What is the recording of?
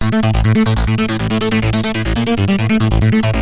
Fun Thingy
Some kinda weird industrial melody that i recorded but didn't use... made in FL studio 11, recorded with Edison in FL studio 11 and legal to use for any song whatsoever.
industrial, evil, melody, dark, bad, bass